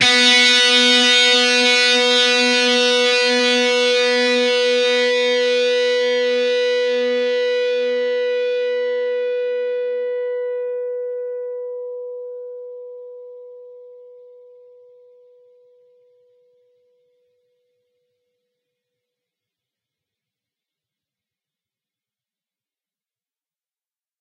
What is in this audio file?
Dist Chr Brock 2strs 12th up
Fretted 12th fret on the B (2nd) string and the 14th fret on the E (1st) string. Up strum.